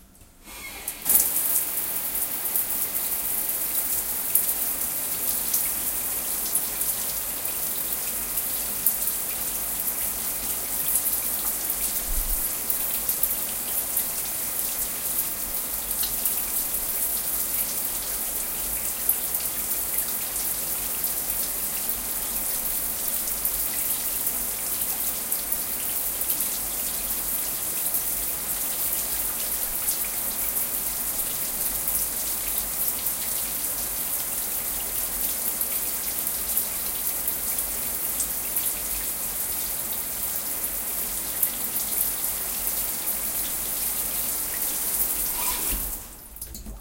Running bath shower
water, running, bath, shower, bathroom, sprinkle